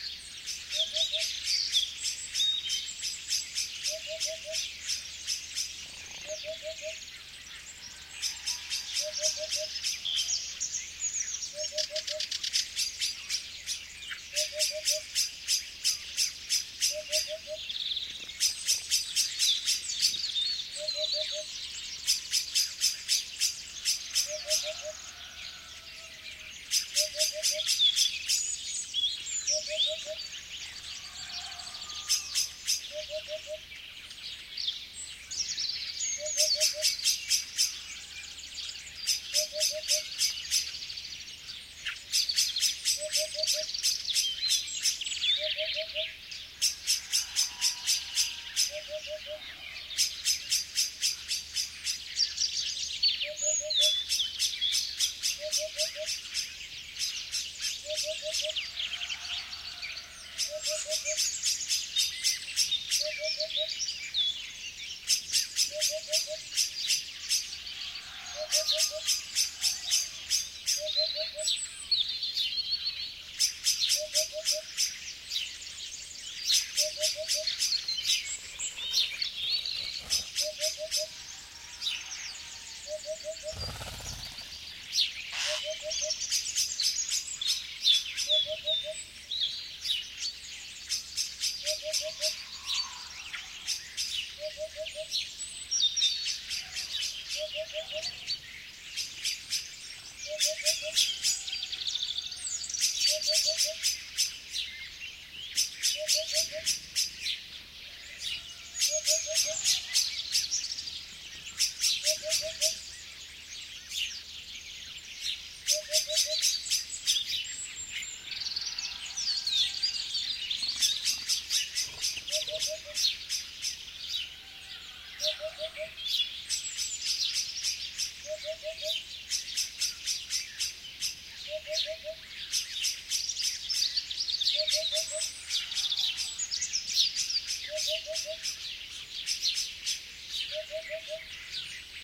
20070407.morning.hoopoe
lots of birds singing near Bernabe House (Carcabuey, Spain), fluttering of wings can be heard on occassions. Sennheiser ME66+MKH30 into Shure FP24, recorded in iRiver H320, decoded to M/S stereo with Voxengo free VST plugin
birds, blackbird, chirp, field-recording, fluttering, nature